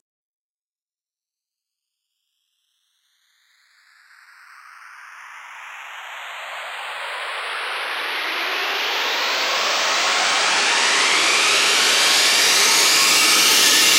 Riser Cymbal 03
Riser made with Massive in Reaper. Eight bars long.
riser, edm, trance